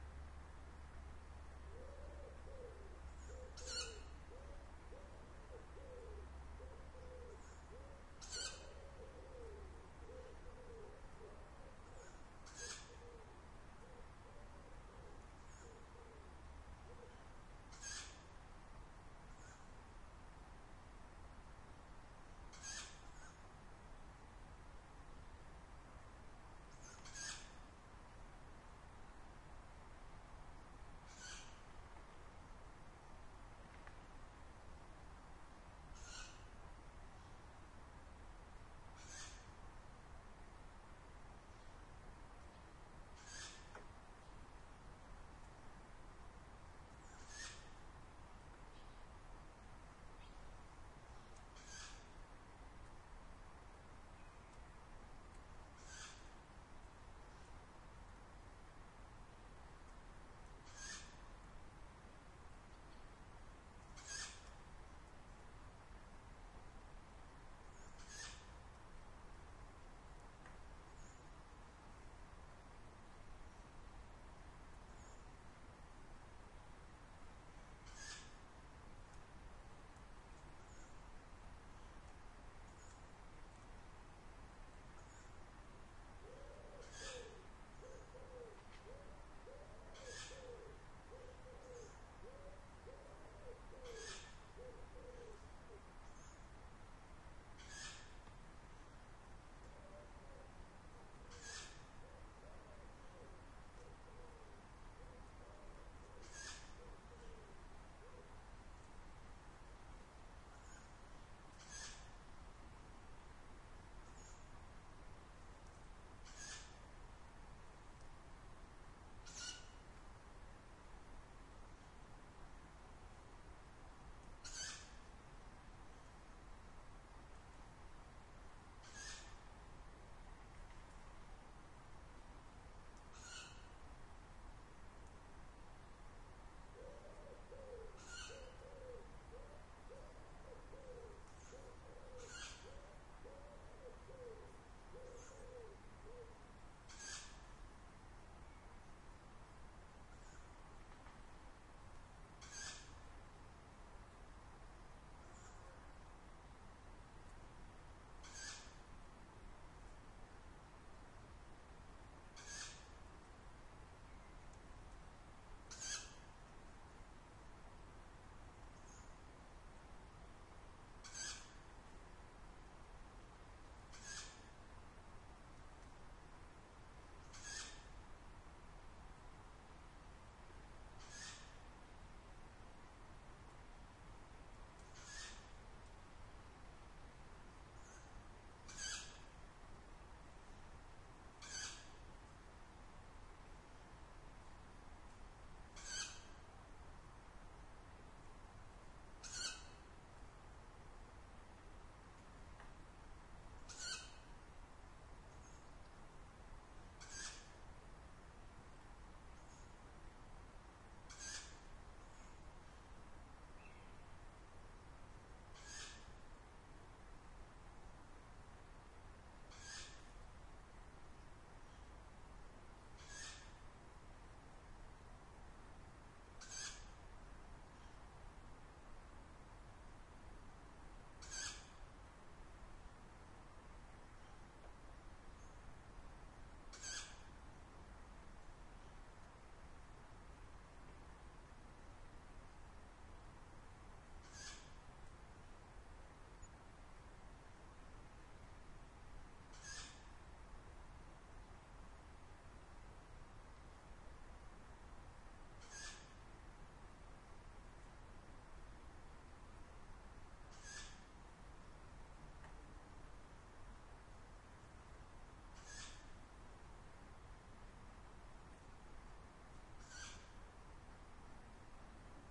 This is only a part of the recording I did. This owl went on for a very
long time, making this noise. Not very owl-like, but still..
This recording was done in the evening in July 2007, using two Sennheiser ME 64/K6 microphones, the beachtek DXA-10 preamplifier and the Sony DAT recorder TCD-D8 with the SBM device.